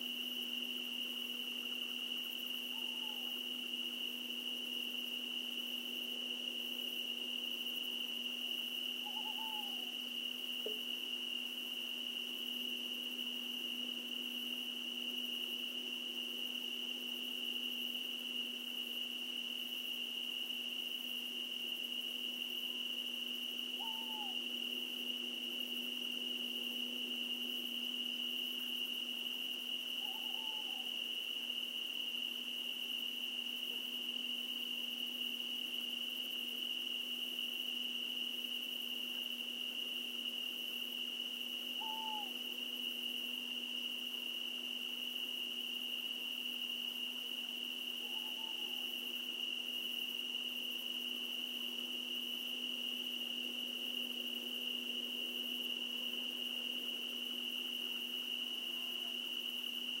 20060706.night.scrub00
recorded in scrub, a not very hot summer night. You can hear a strong cricket chorus and if you pay enough attention also nightjars and an owl. Rode NT4 > Shure FP24 > iRiver H120(rockbox)